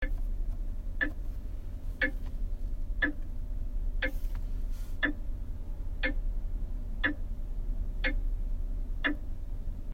Medieval clock ticking